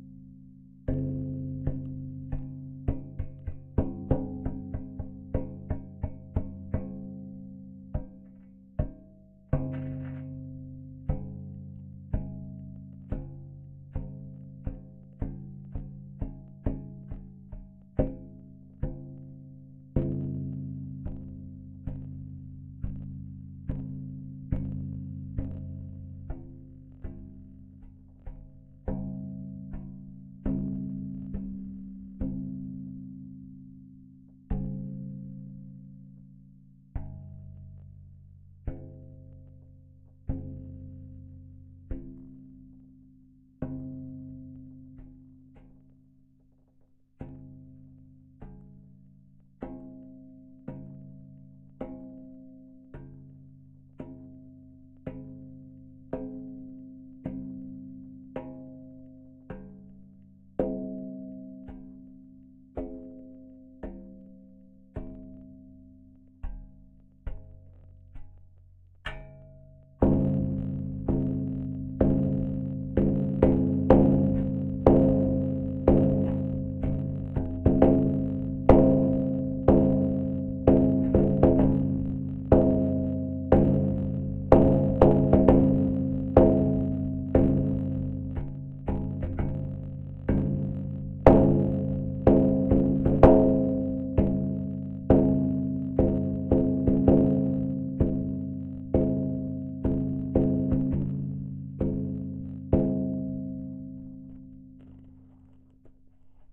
Soft metal gong
Softly tapping a piece of sheet metal held in a clamp. I managed to capture quite a lot of resonance from the metal.
metal, field-recording, piezo-mic, gong